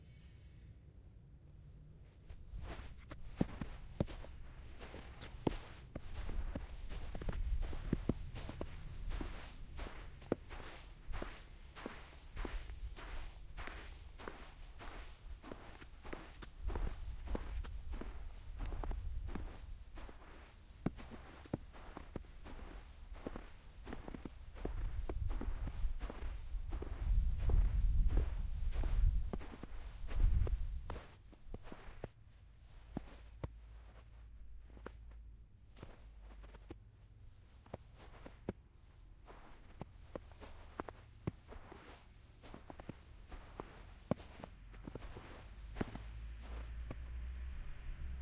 Snow Walinkg - B09h50m50s12feb2012
An unedited recording of me walking in the snow with leather shoes.
Recorded with a pair ofSE3 SE-Electronics and a Fostex FR-2LE.
foley, snow, snow-walking